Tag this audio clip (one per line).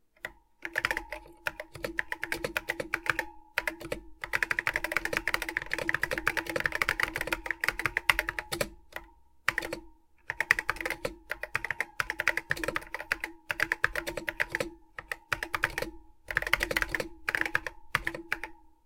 mechanical
type
keystroke